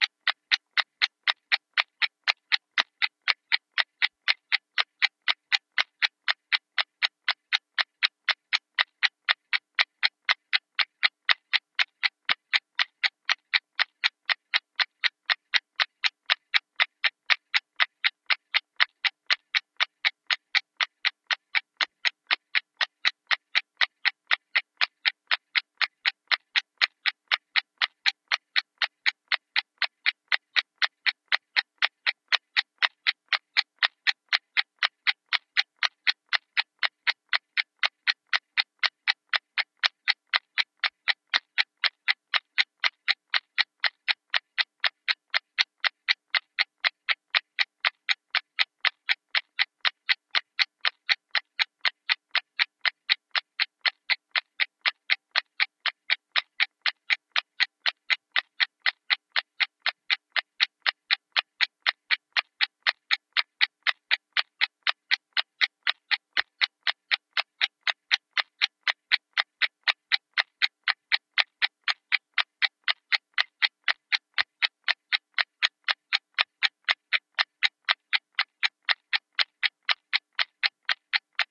Ticking of mechanical (wind-up) clock, as captured with a simple homemade piezo contact microphone attached to the rear surface. The clock was a cheap folding travel alarm ("Equity" brand, made in China and purchased at a local pharmacy), and the microphone was made from a Radio Shack piezo buzzer.
mechanical, tick, wind-up, clock, contact-microphone, piezo, ticking, contact